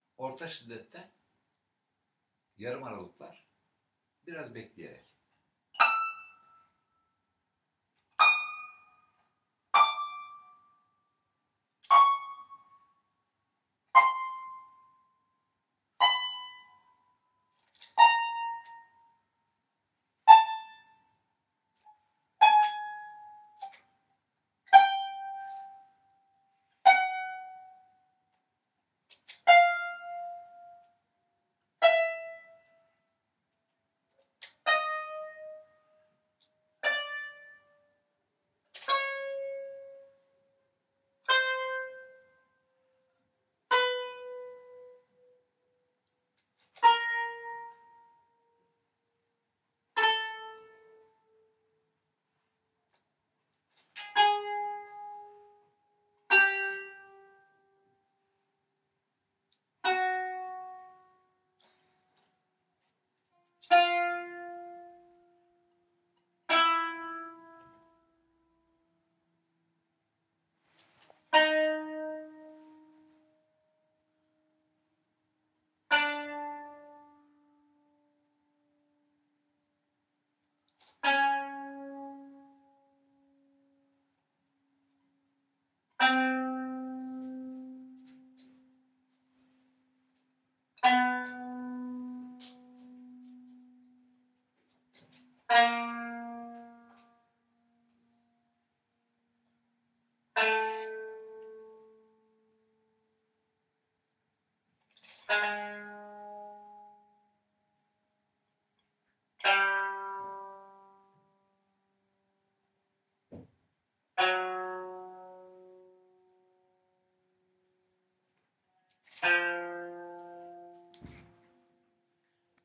The recording includes isolated notes recorded from the popular Turksih music instrument kanun. All chromatic tones within the range of the instrument is contained.